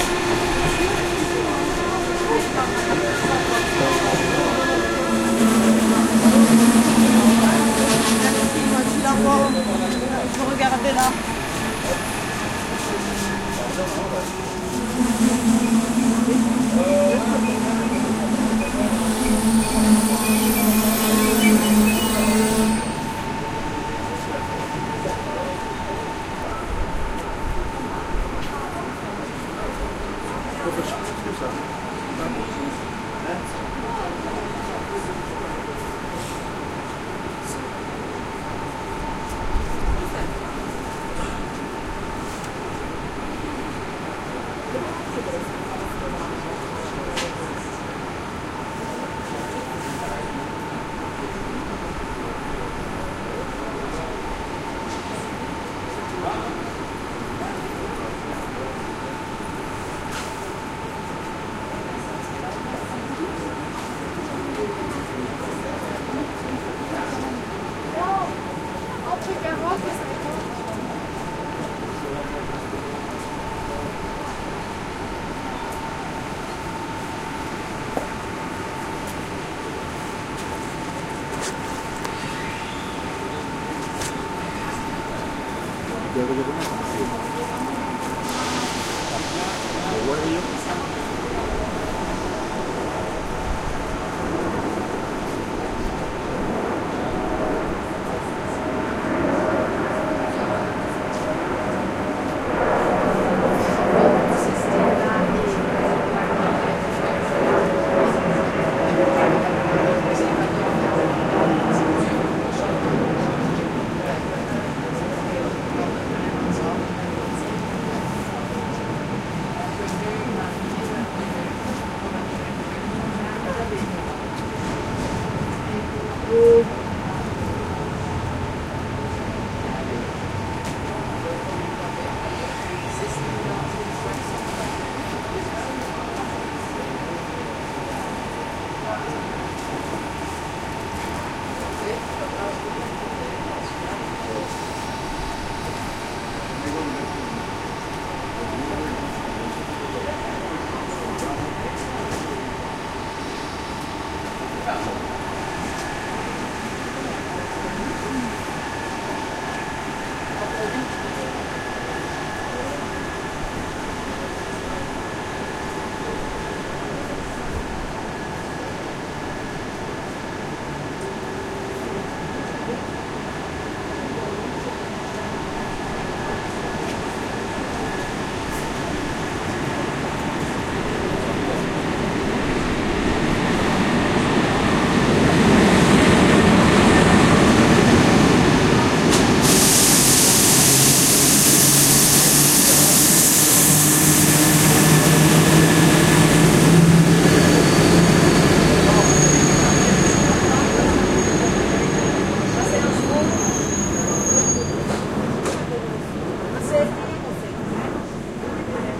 20100403.Brussels.Gare.Nord.platform
ambiance on a platform of North Station in Brussels, Belgium. You will hear trains arrive and depart, voices speaking in French, idling engines... Olympus LS10 internal mics